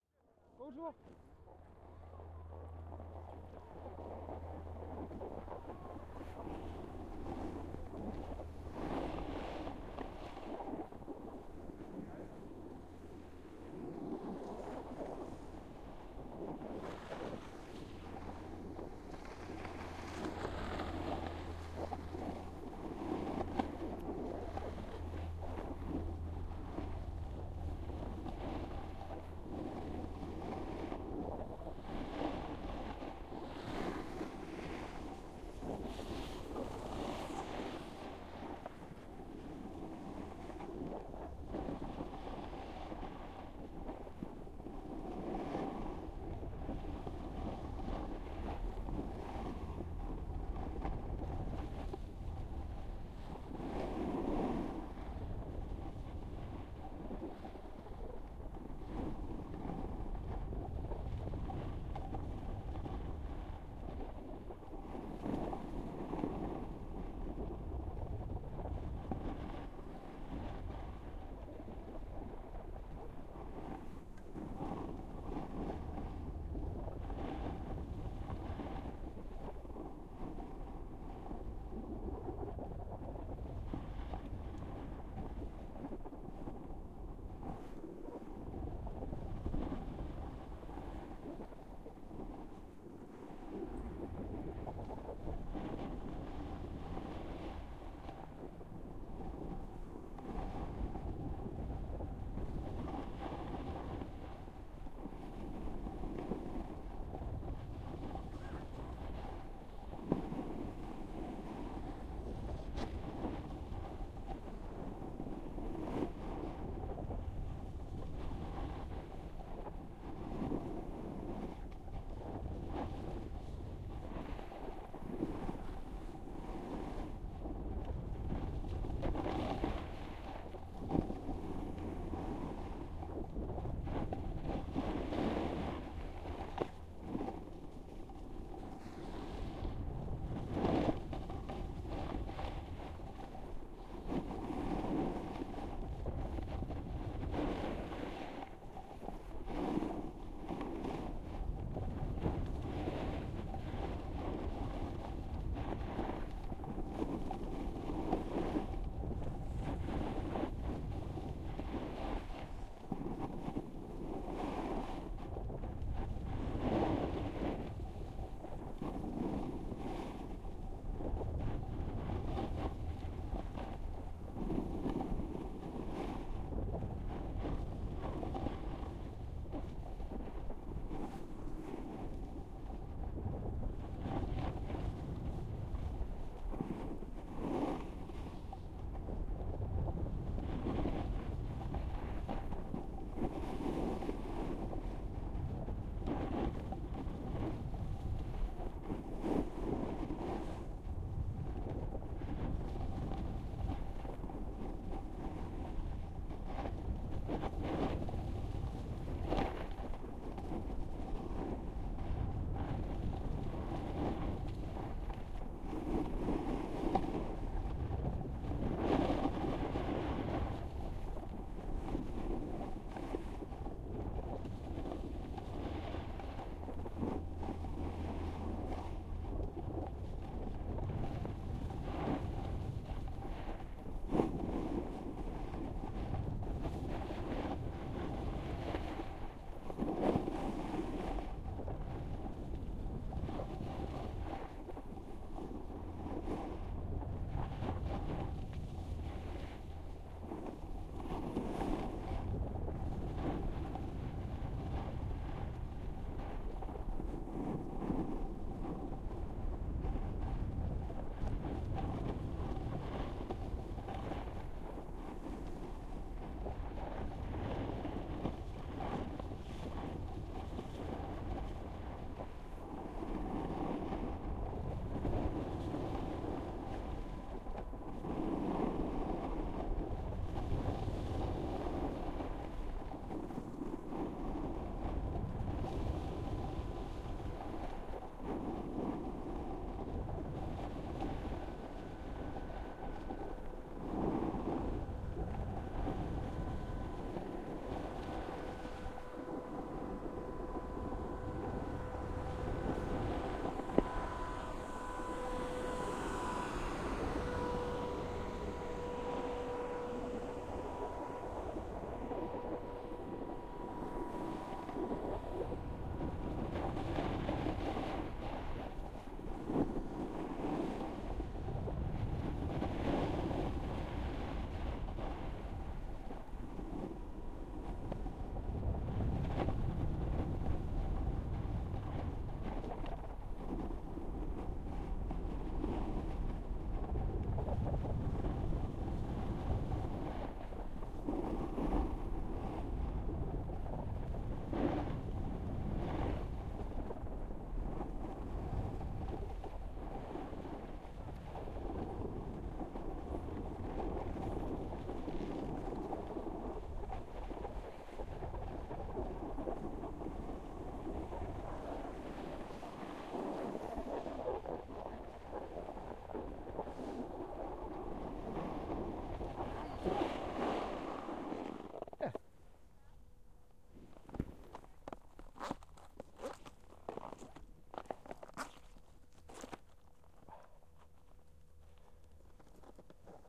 Trysil 2016-01-17 red pist on snowboard
I went down a mountain on snowboard in Trysil, Norway. This took me about 6.15 or so minutes to do about 2.40 km. Go easy on me, it was the third day on snowboard.
Recorded with an Olympus ls-100 and a couple of binaural in-ear mics.
sport, trysil, binaural, snowboarding, snow, norge, skandinavien, scandinavia, olympus-ls100, downhill, pist, red-pist, sports, norway, slalom, snowboard